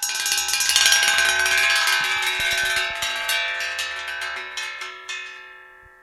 Metal object ringing
brush, hits, objects, random, scrapes, taps, thumps, variable